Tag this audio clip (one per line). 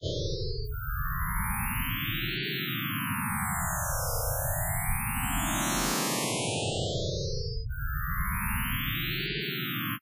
synth
image
space